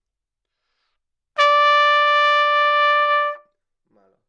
Part of the Good-sounds dataset of monophonic instrumental sounds.
instrument::trumpet
note::D
octave::5
midi note::62
good-sounds-id::2843
D5, good-sounds, multisample, neumann-U87, single-note, trumpet